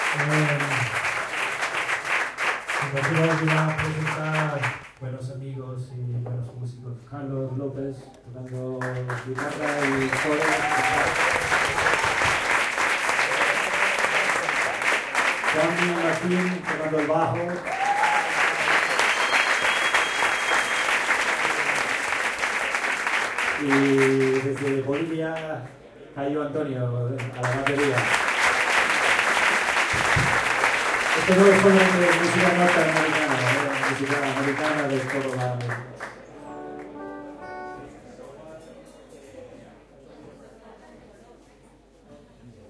20090426.small.venue.03
Dan Kaplan introduces the musicians of his group in Spanish. Applause. Edirol R09, internal mics
applause, field-recording, male, music, ovation, performance